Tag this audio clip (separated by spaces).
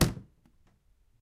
plastic field-recording furniture ambient noise shoe-bin plastic-door shoe-rack